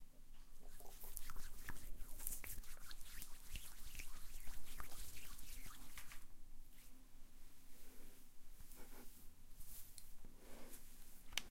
Hand lotion application
Martina applies a hand lotion, rubbing the lotion in palms and making funny
sounds. Part of Martina's Evening Routine pack.
Recorded with TASCAM DR-05
Signed 16 bit PCM
2 channels
You're welcome.
bathroom, face, cream, high-quality, female, hq, squelching, hand-lotion, hands, hygiene, application, evening, woman, wet, smacking, lotion, wet-hands, hand, polish